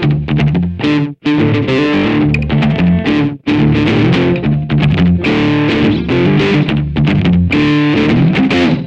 blues.loop02.distort.fast
a few looping blues bars (fast tempo, Hendrix style, hehe) played on Ibanez electric guitar>KorgAX30G>iRiver iHP120 /unos cuantos compases de blues tocados en una guitarra electrica con distorsion
blues, musical-instruments, distortion, loop, electric-guitar